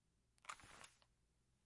Drawing gun 2
Drawing a gun from a cloth pants pocket. recorded with a Roland R-05
pocket, gun, draw, drawing, pants, cloth